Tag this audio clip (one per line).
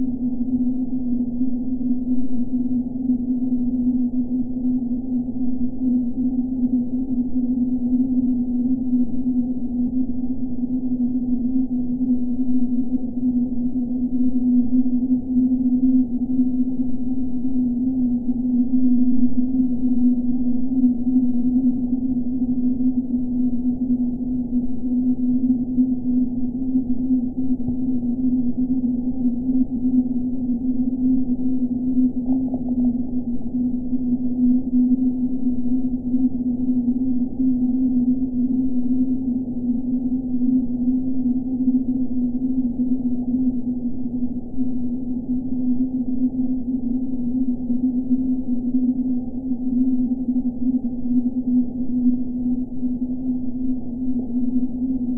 terrifying
horror-fx
horror-effects
horror
ghost